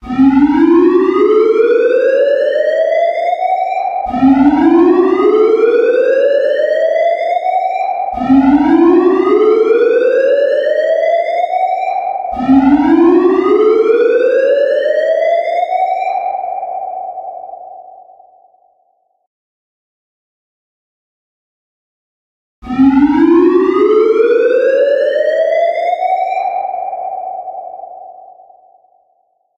Evacuation Alarm Chirps (Reverbed)
Chirp sound, 250Hz - 800Hz Square waveform. Linear interpolation. Wet reverbed and compressed to 3:1 ratio. Equalized with RIAA wave curve (top-left to bottom-right)
Each chirp lasts 3.750s. repeats 4 times layered over itself, including the last chirp without any layered sounds on it.
alarm, alert, digital, disaster, emergency, evacuate, evacuation, horn, reverb, siren, sound, square-wave, warning